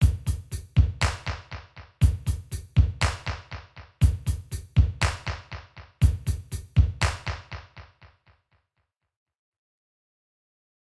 SnS Drums
The drums accompaniment for Soundswaves and Sureality. Created using Mixcraft Pro Studio 7.